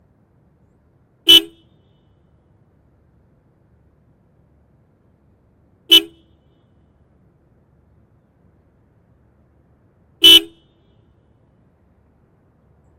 Clip featuring a Mercedes-Benz 190E-16V horn being applied in 3 short bursts. Recorded with a Rode NTG2 in a blimp approximately 5' in front of the car. Some echo apparent.